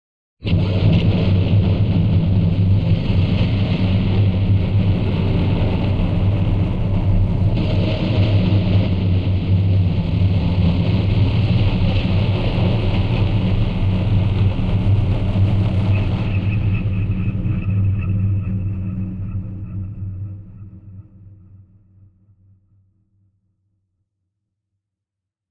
Guitar being routed through multiple chains of fx.
Pitchshifters, delays, reverbs and compression.